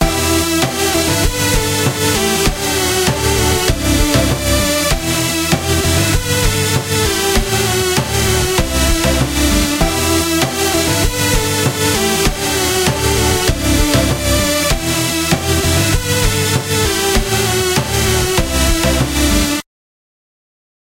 happy loop
cheerful, Happy, drums, party, synths, loop, celebration, upbeat